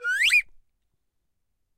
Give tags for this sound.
silly; whistle; soundeffect

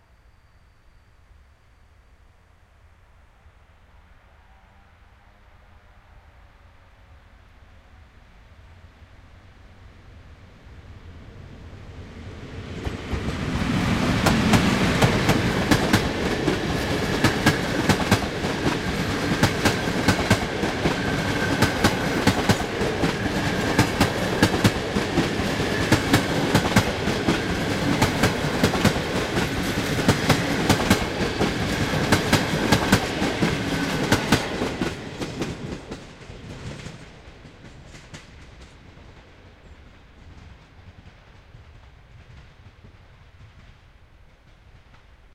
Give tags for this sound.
ambience; train; transport

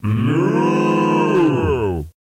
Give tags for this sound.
Cows; Human; Moo; Mooing

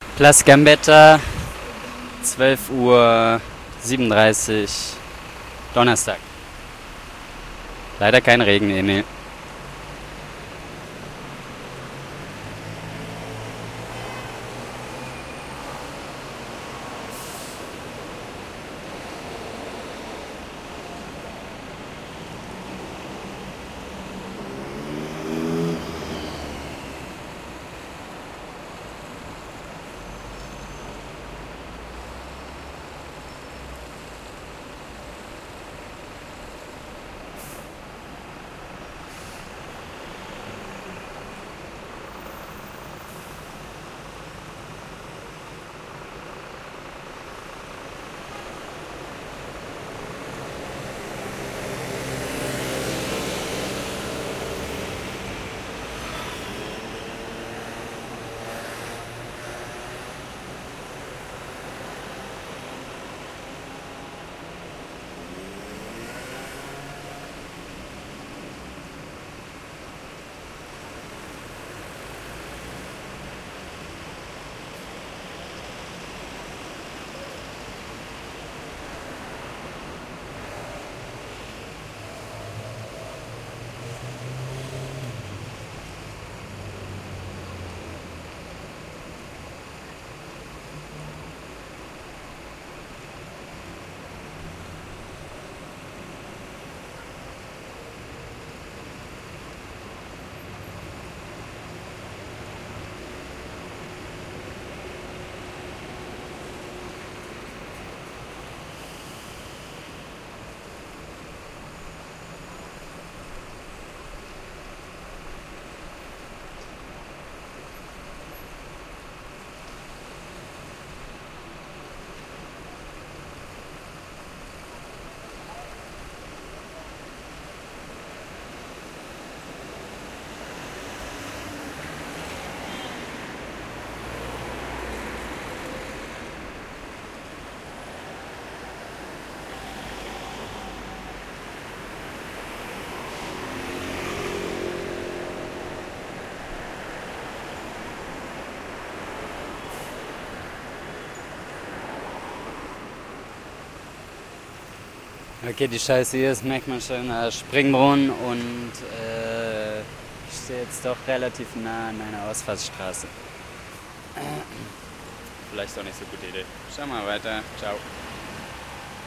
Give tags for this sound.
Ambience; Paris

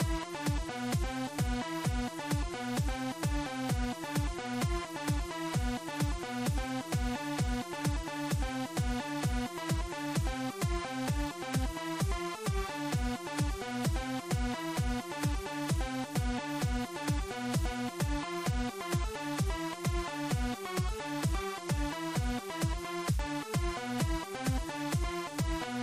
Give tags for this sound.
awesome; bass; beat; cool; drum; drums; effect; fl; fruity; fruityloops; great; hat; kick; library; loops; music; original; short; simple; soundeffect

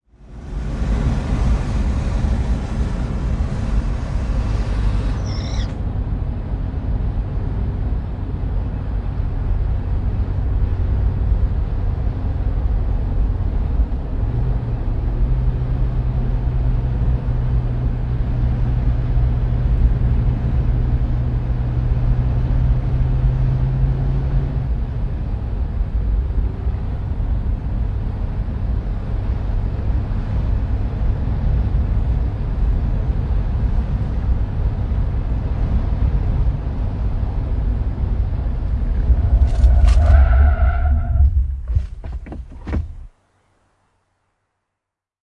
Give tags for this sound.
Ajaa; Ajo; Auto; Autoilu; Autot; Brakes; Car; Cars; Drive; Driving; Field-Recording; Finland; Finnish-Broadcasting-Company; Interior; Jarrut; Renkaat; Run; Screech; Soundfx; Suomi; Tehosteet; Tyres; Ulvoa; Yle; Yleisradio

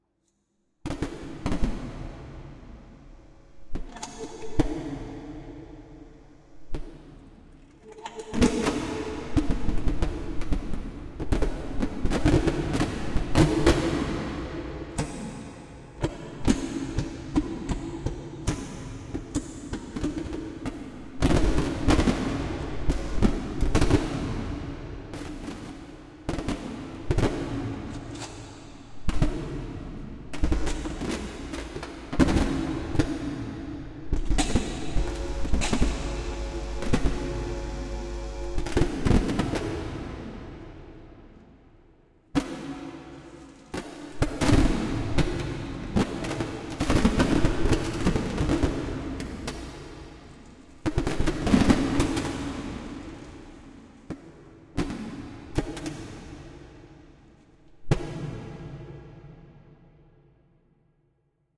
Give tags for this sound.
effect; sfx; sound

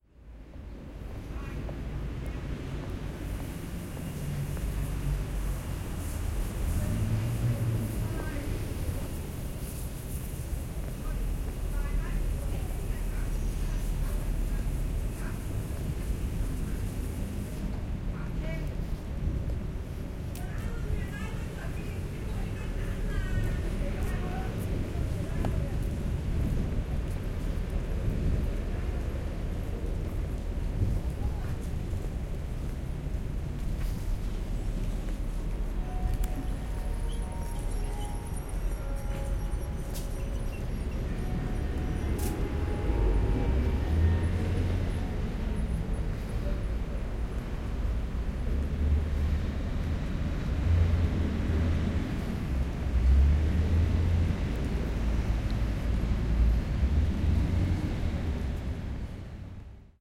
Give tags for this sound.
Field-Recording; Macau

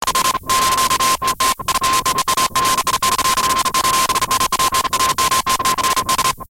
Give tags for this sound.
alien; blanket; computer; cosmic; effect; science-fiction; sci-fi; SF; ufo; wave

